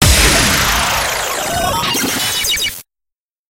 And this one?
Radio Imaging Element
Sound Design Studio for Animation, GroundBIRD, Sheffield.
bed, imaging, radio, splitter, sting, wipe